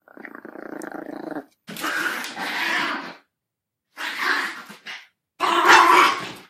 Chihuahuas Fight/Growl
Here are some recordings of my chihuahua puppies growling/fighting.